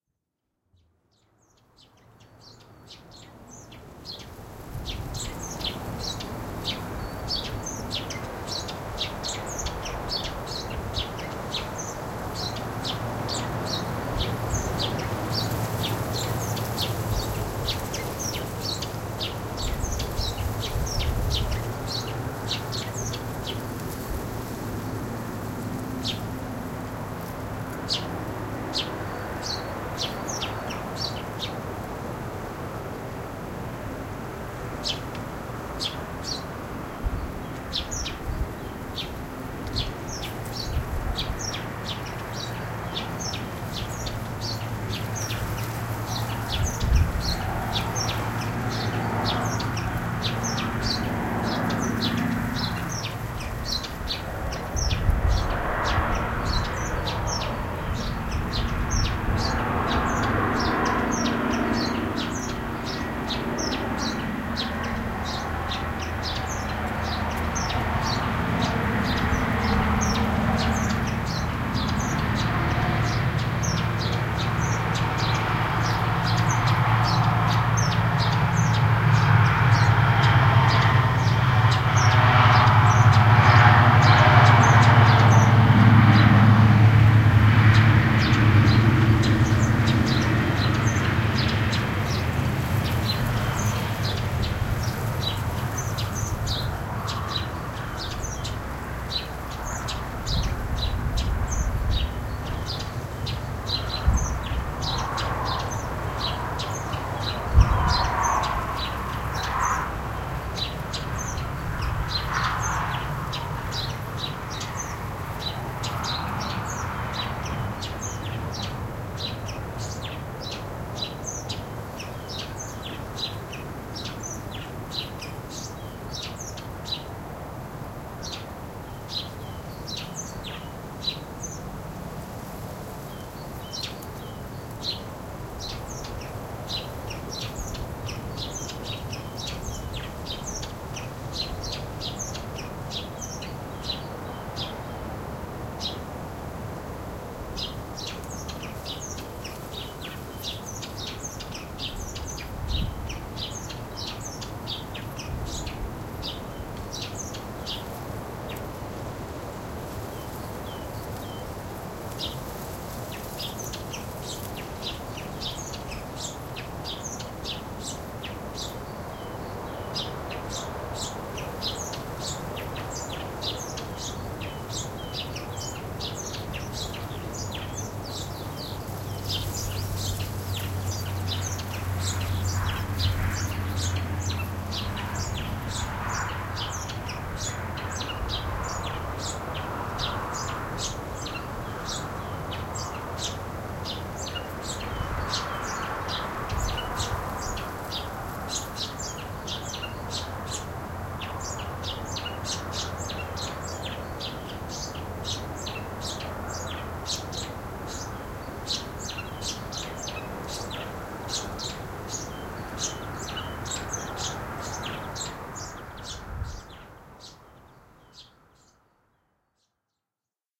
Sunny February afternoon, Røsnæs Kalundborg in Denmark. Garden surroundings. A single bird is singing, wind, bamboo bush weaves in the air, distant cars and a plane overfly. Recorded with Zoom H2 build in microphones.
airplane, ambience, ambient, bird, bush, denmark, distant-cars, field-recording, garden, kalundborg, nature, plane, rumble, soundscape, wind